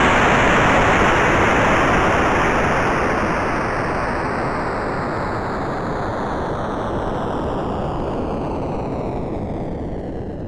retro video-game 8-bit explosion